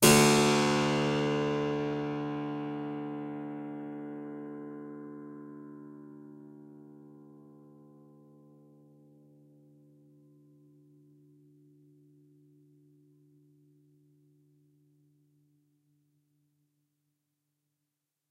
Harpsichord recorded with overhead mics
Harpsichord, stereo, instrument